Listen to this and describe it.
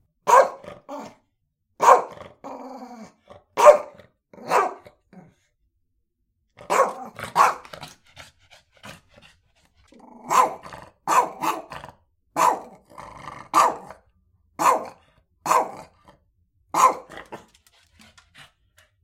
A small dog barking and growling for an extended period of time.
yip, growl, barking, bark, snarl, dog, animal